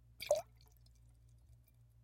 Pour 1 Shot FF317
Short pour of liquid into empty glass
glass, liquid, pour